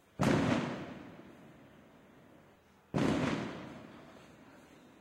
a couple firework explosions
bang, blast, cracker, detonation, explosion, field-recording, fireworks